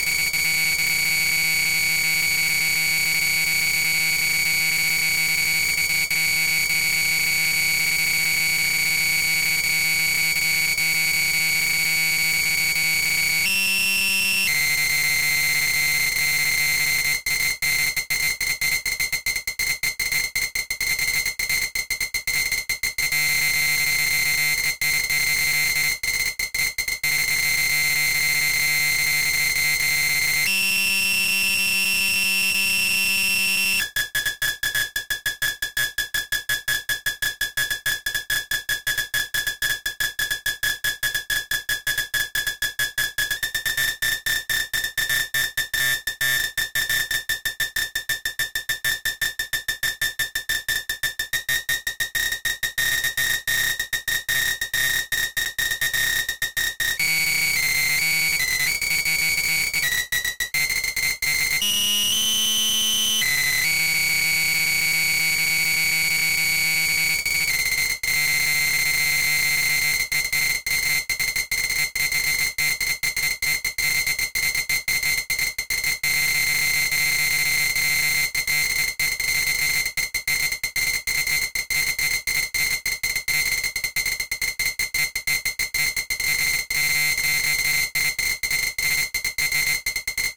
A simple glitch made increasing and manipulating the speed of a percussion timbre.